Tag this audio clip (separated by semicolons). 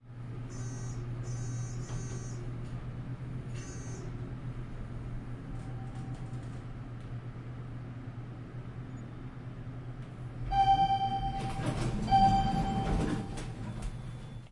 Elaine; Field-Recording; Koontz; Park; University